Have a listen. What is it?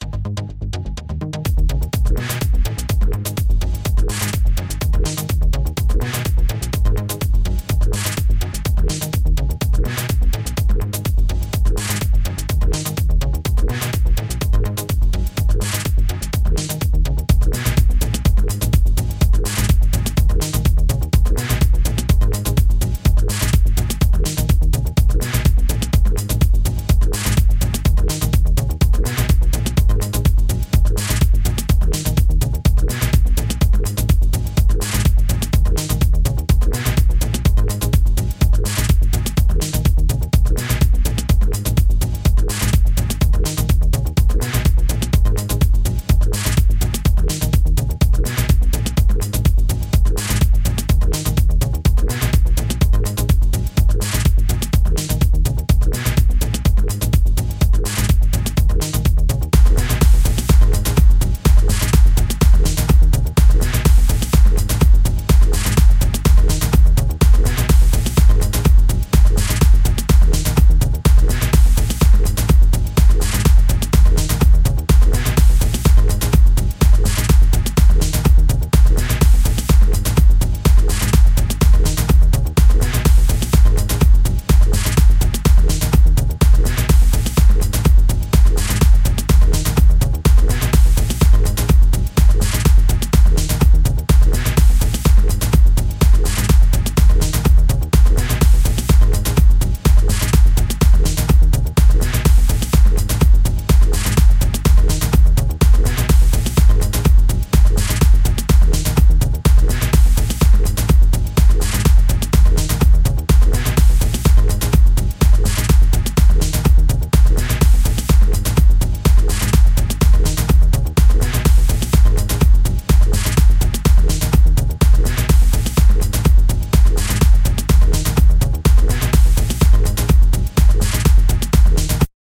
running music
music, free, song